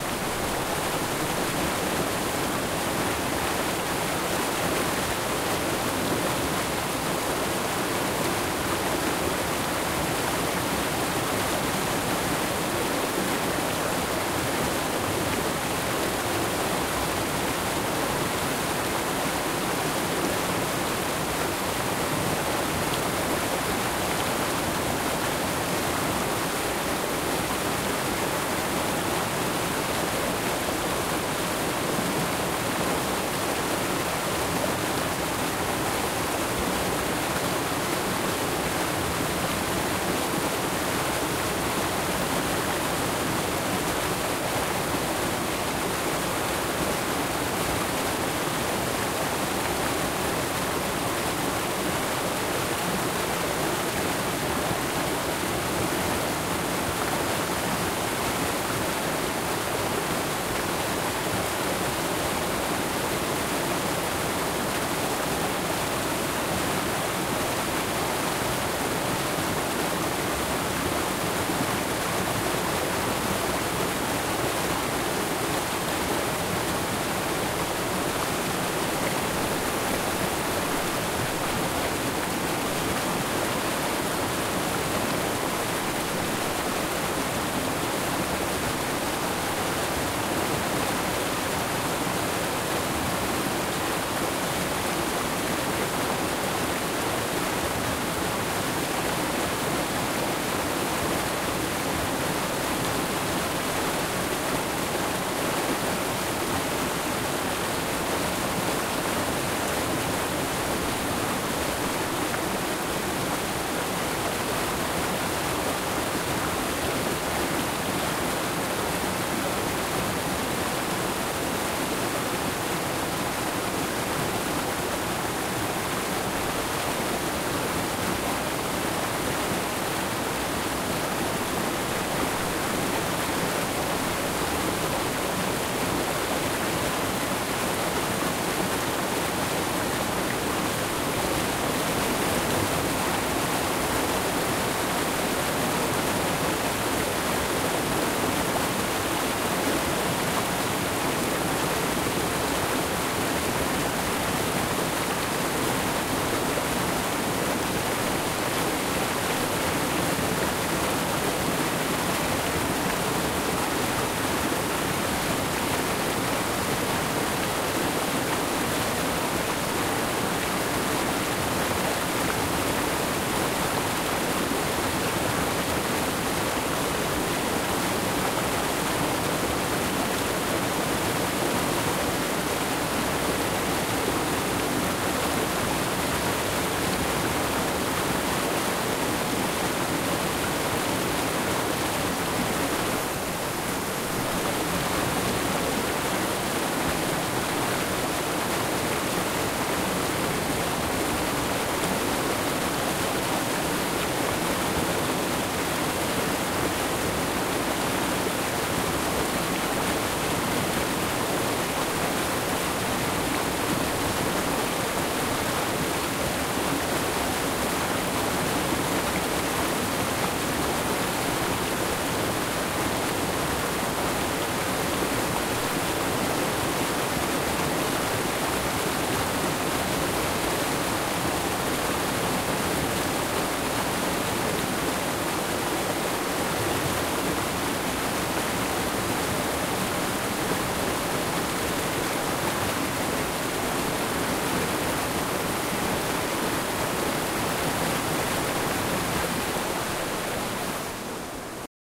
Up close to rapids
On this one I was standing in the middle of the creek on some rocks with my recorder shoved right up close to the rapids. Very up front and loud sounding. Zoom H4N
ambience, creek, rapid